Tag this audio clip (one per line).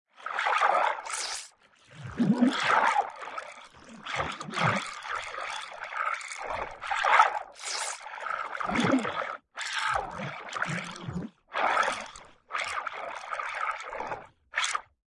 breath
comb
envelope
grain
water